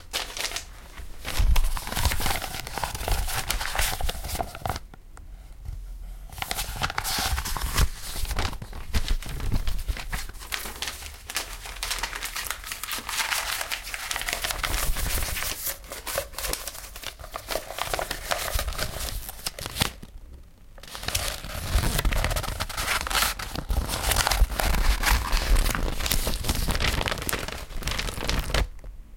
PAPER SHUFFLING SCROLL UNROLLING
Unrolling a scroll
paper, scroll, shuffle, unrolling